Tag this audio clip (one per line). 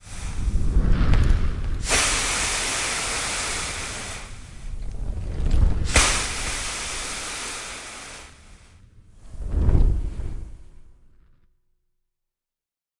lava
steam
air
hiss
burst
Valcano